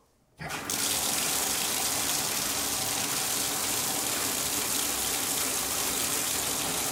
turning on tub
intermediate, class